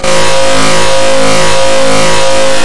mic--noise23423423423
loud glitch from a microphone